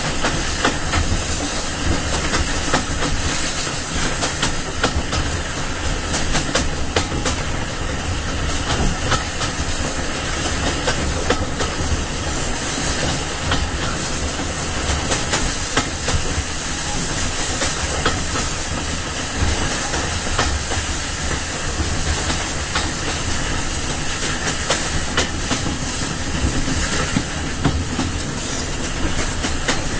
On board a steam train, going quite slowly
slow, steam, train